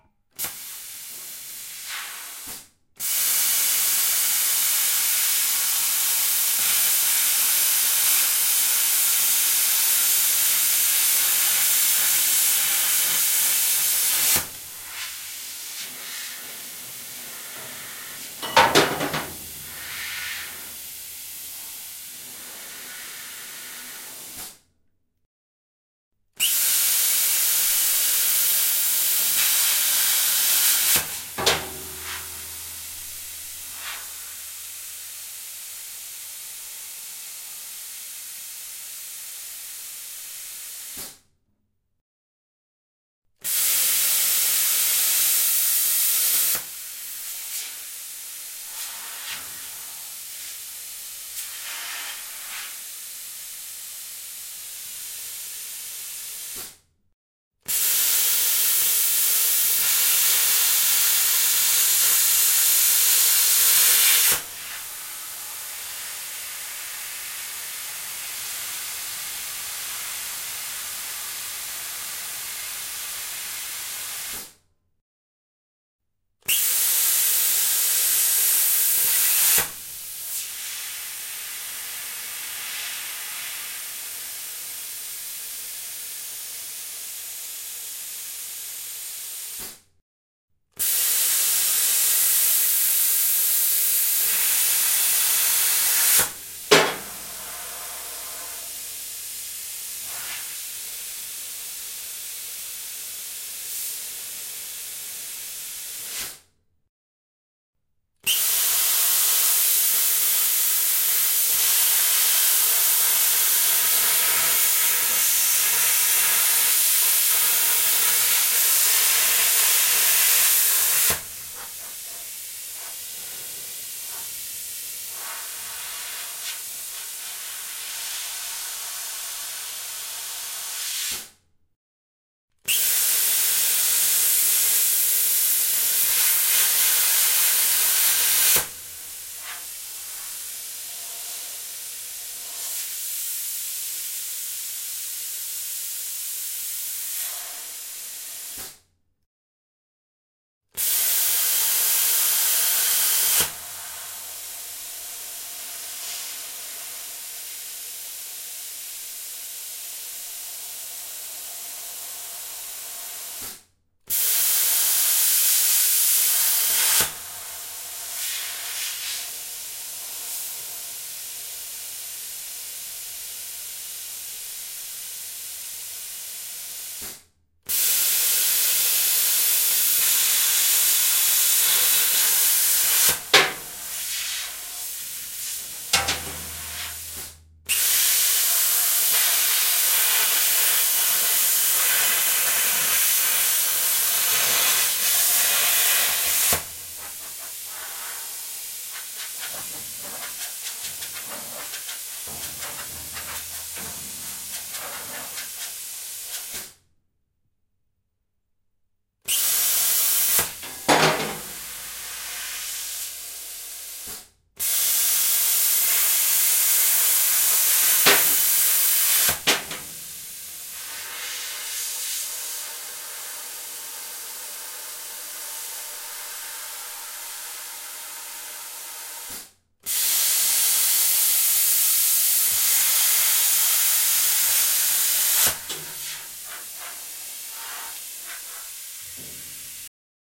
Using a plasma cutter to cut a metal plate in pieces.